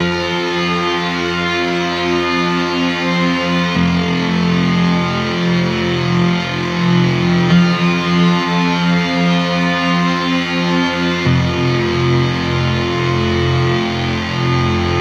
No Turning Back Synth Pad
When what has been done cannot be undone; loop-able synth pad of realization and regret.
Bright-Synth, Cinematic, Drama, EDM, Fear, Loop, Pad, Return, Stereo, Synthesizer